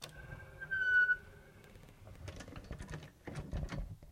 Drawer sliding open